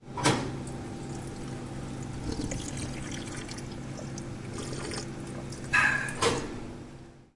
13 bebent de la font
Grabación del sonido de beber agua en una fuente canaletes en el campus de Upf-Poblenou. Grabado con zoom H2 y editado con Audacity
Recording of the sound of a waterfountain in Upf-Poblenou Campus. Recorded with Zoom H2 and edited with Audacity.
agua, campus-upf